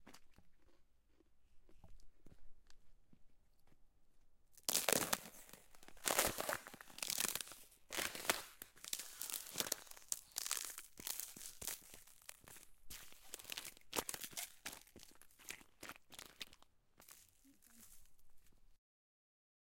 Footsteps FrozenPuddles-003
Walking on Frozen Puddles
crack, foot, footstep, freeze, frost, ice, puddle, snow, step, wet, winter